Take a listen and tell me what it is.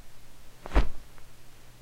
Some fight sounds I made...